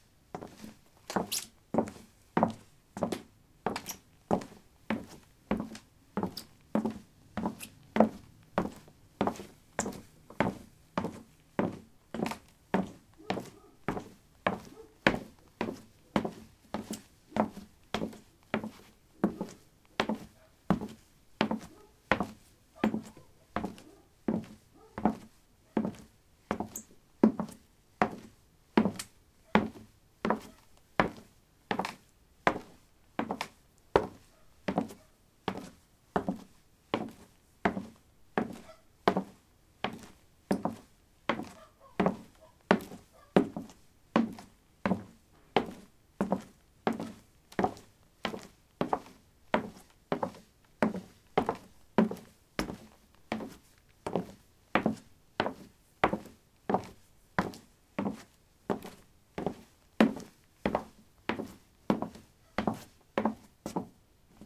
Walking on a wooden floor: squeaky sport shoes. Recorded with a ZOOM H2 in a basement of a house: a large wooden table placed on a carpet over concrete. Normalized with Audacity.